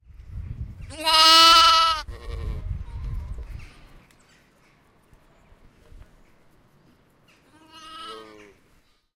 Baby lamb calling his mother. Recorded with a zoom H1n in a City Farm in The Hague.
Morning, 12-03-15.
farm, field-recording, lamb